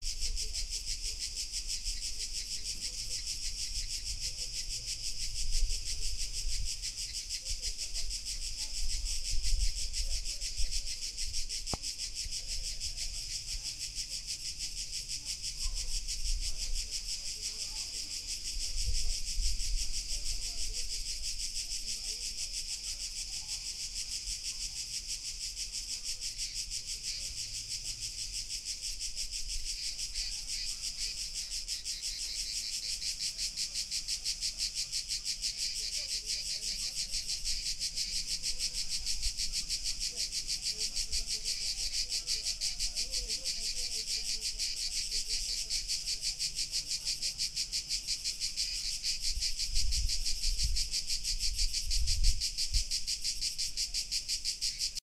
Crickets In August 2
Crickets recorded on the hot summer day on the island Vis.
Ambience, Atmosphere, Chilling, Crickets, Day, Field-recording, Hot, Nature, Shades, Summer, Trees, Village, Warm